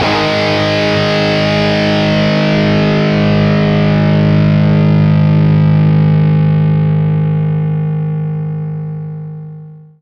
G#2 Power Chord Open